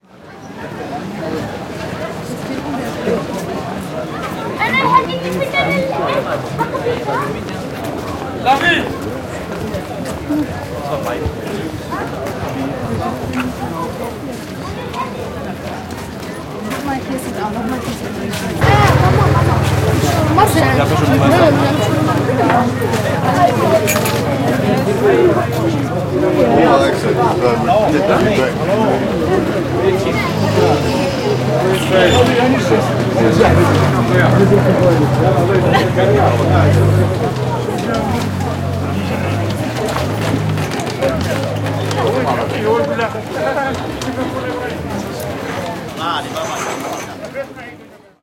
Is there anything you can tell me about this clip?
Souks Marrakesch 1
Atmosphere walking through she "Souks", the biggest market on the african kontinent.
Marokko, Marrakech, africa